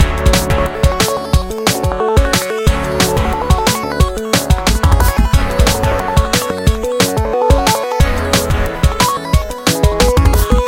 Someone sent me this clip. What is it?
Melodic loop with drumpattern. cheers :)
melodic, synth, loop, drum, drumloop, vsti, synthesizer, arpeggiator, melody